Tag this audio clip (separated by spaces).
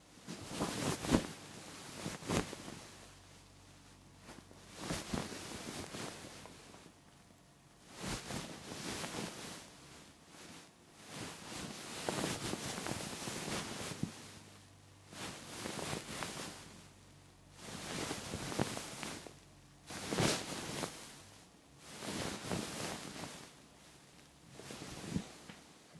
cloth cover pillow